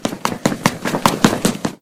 Running back (on solid floor)
Running back onto the screen.
Recorded for the visual novel, "The Pizza Delivery Boy Who Saved the World".